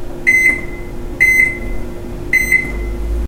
Pressing Microwave buttons
microwave, beep, buttons